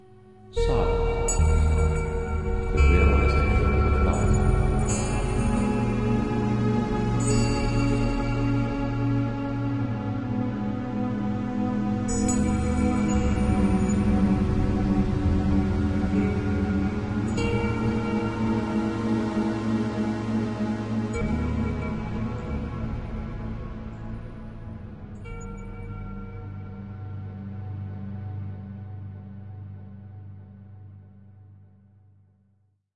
strangle bell 2
A series of synthetic bells and strings over a quiet voice speaking of Sadhana - part of my Strange and Sci-fi pack which aims to provide sounds for use as backgrounds to music, film, animation, or even games.
dark, religion, bell, voice, processed